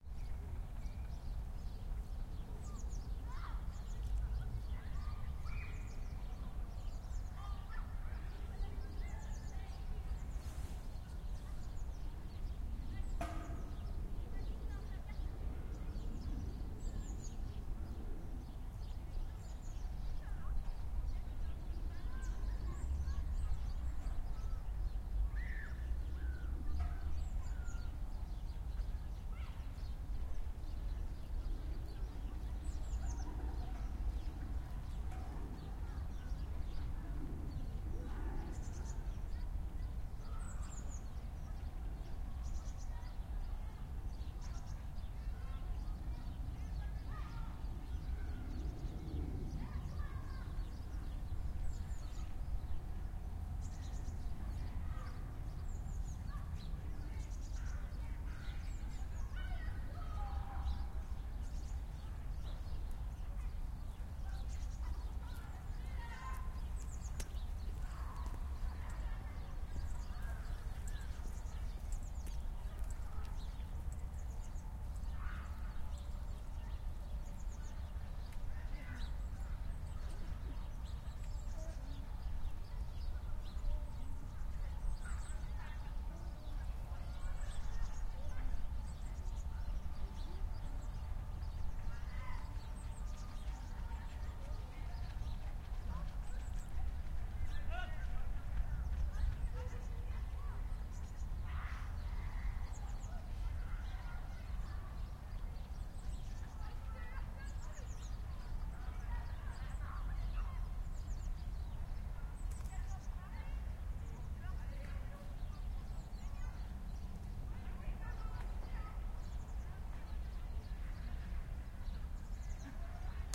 Jardin des plantes - oiseaux - enfants - passants - voitures fond
In the "Jardins des plantes" (plant park) in Paris.
Birds, children playing and laughing, pedestrians and cars in background
france, park, children, birds, paris